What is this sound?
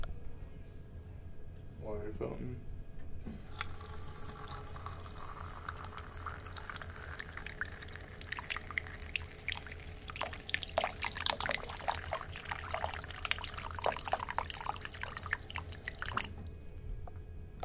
Water Fountain- The size of the environment in which the water fountain was in was small. It is located above a tile floor non carpet area. There were no people around. Was recorded on an H2 Stereo Digital recorder.
College, COM371, School